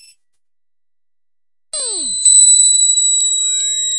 high frequencies C5
This sample is part of the "K5005 multisample 20 high frequencies"
sample pack. It is a multisample to import into your favorite sampler.
It is a very experimental sound with mainly high frequencies, very
weird. In the sample pack there are 16 samples evenly spread across 5
octaves (C1 till C6). The note in the sample name (C, E or G#) does
indicate the pitch of the sound. The sound was created with the K5005
ensemble from the user library of Reaktor. After that normalizing and fades were applied within Cubase SX.